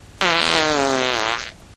an awesome fart
fart, flatulation, flatulence, gas